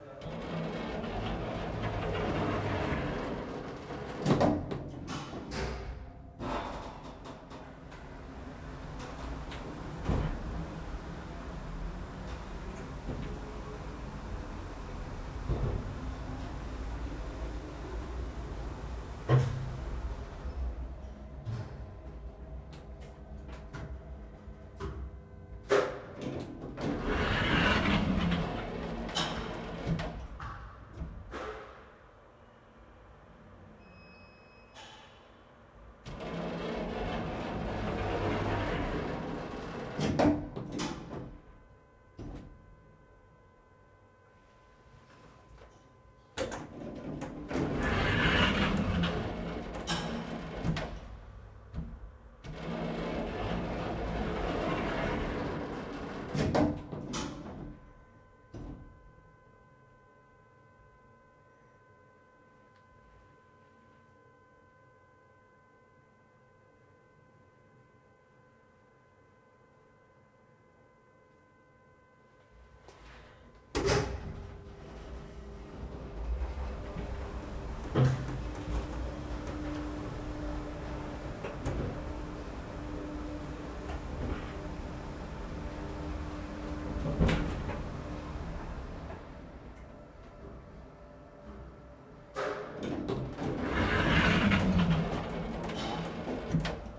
PB SFX ELEVADOR 02
Elevator sound recorded in Porto during a tv series shooting set between takes.
zoom f8
sennheiser MKH8060